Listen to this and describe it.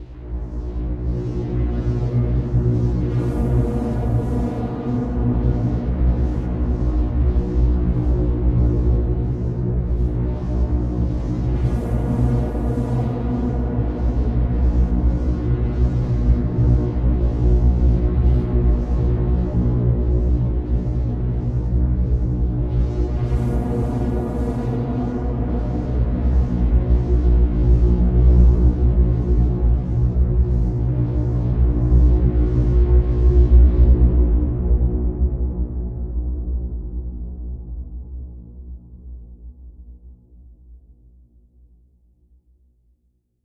Dark Ambient backgrounding 002
Creepy deep electronic sound to use fill your music's background. Wavy electric mix of basses and highs with metallic taste.